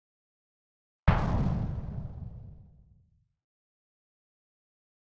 Synthesized Explosion 04
Synthesized using a Korg microKorg
grenade, explosive, dynamite, bomb, explode, explosion, synthesis